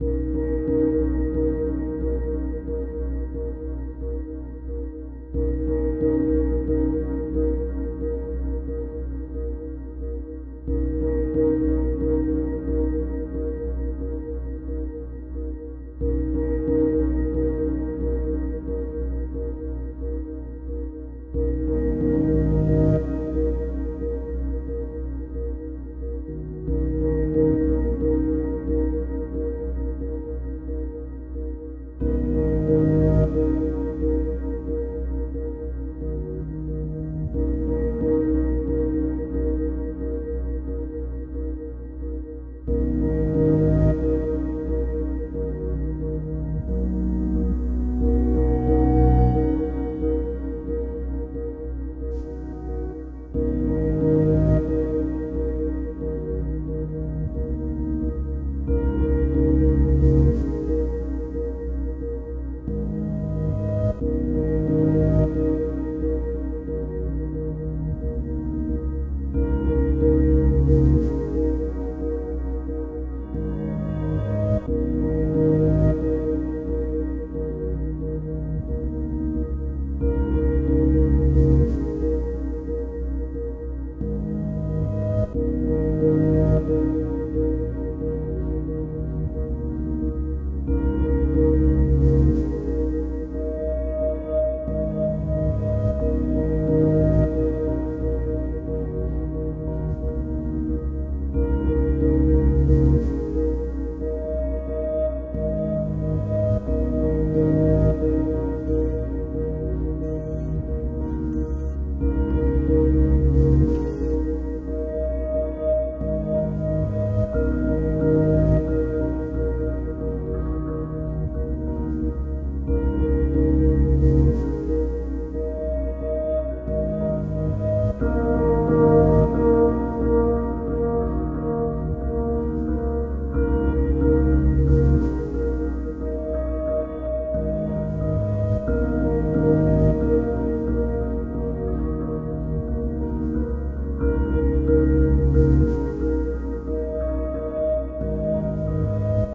Filtered Piano Looped 1 - (90bpm)
๐Ÿ…ต๐Ÿ† ๐Ÿ…ด๐Ÿ…ด๐Ÿ†‚๐Ÿ…พ๐Ÿ†„๐Ÿ…ฝ๐Ÿ…ณ.๐Ÿ…พ๐Ÿ† ๐Ÿ…ถ